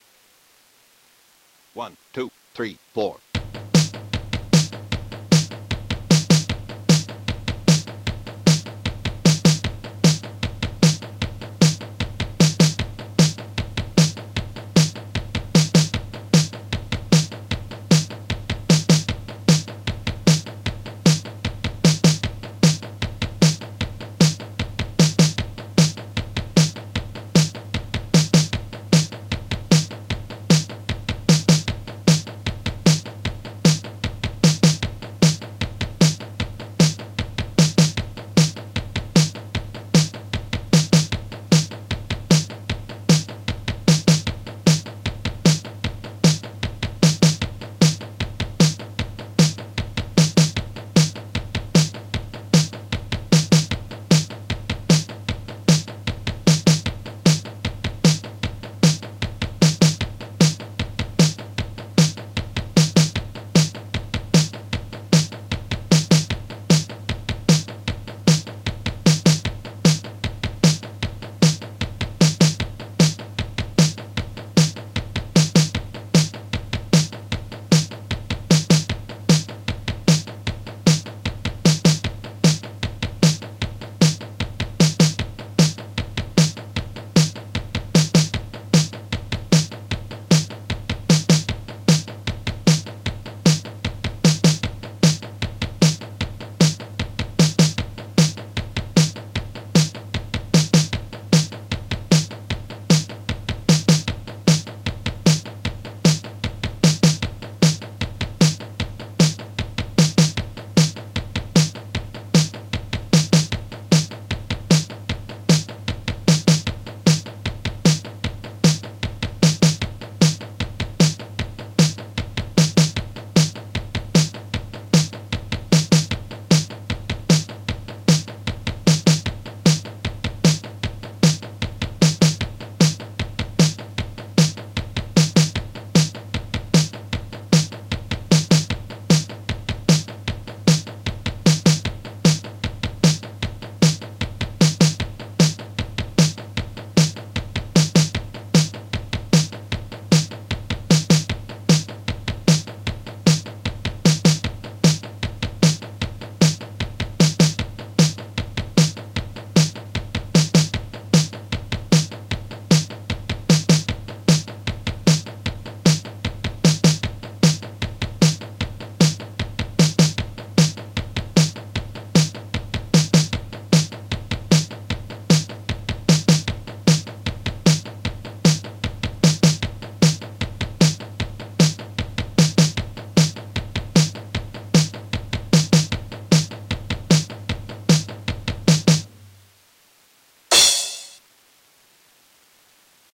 Punk recorded recorded digitally from Yamaha drum pad. My favorite beat.